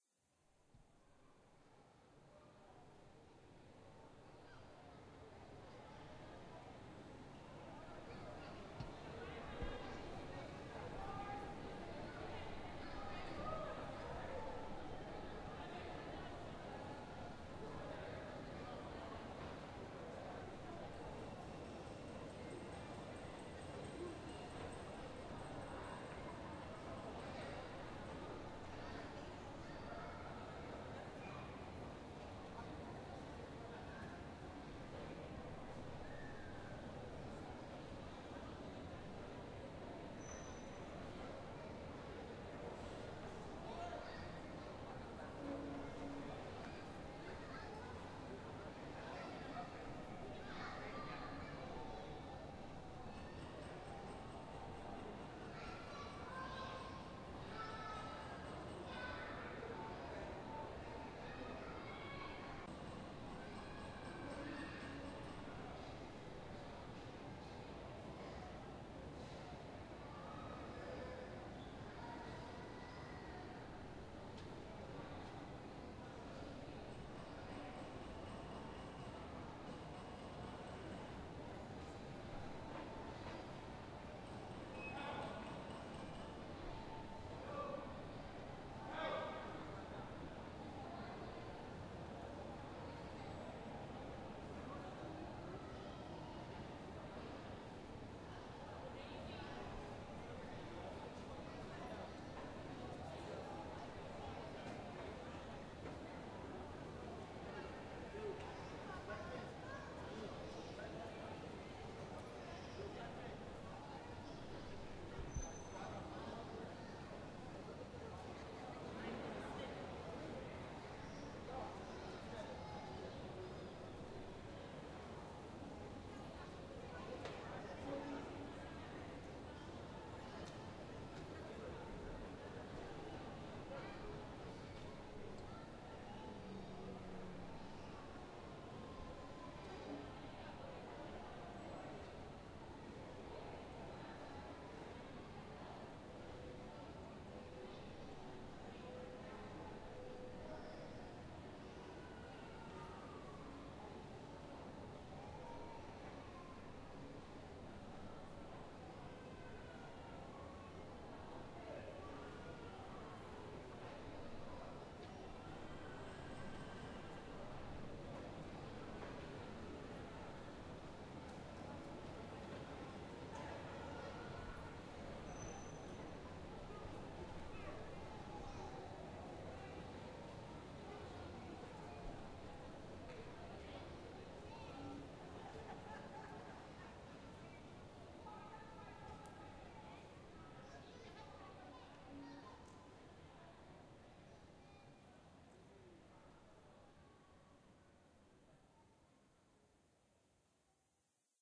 09 Gallery Mall Ambiance
Ambient recording of the Gallery Mall in Philadelphia, Pennsylvania, USA